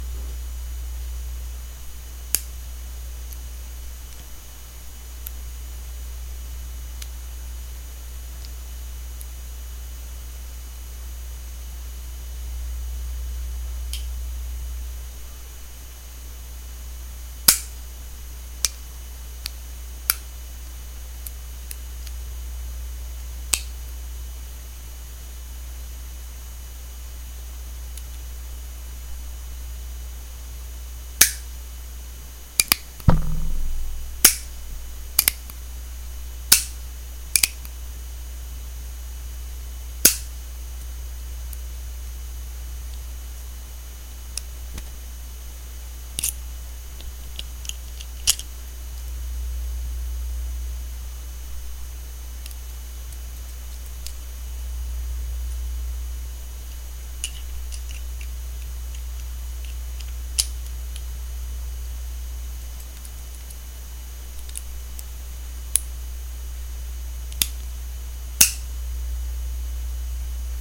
Short Revolver handling
A small .22 caliber "gut gun" revolver. The bullet is longer than the barrel. I used a small desktop mic (not sure of the brand name, but it was pretty cheap), recording directly into my computer. Recorded in a small room. Cocking, dry-firing, cylinder removal/insertion, etc. included on the recording.
gun, gutgun, handgun, pistol, revolver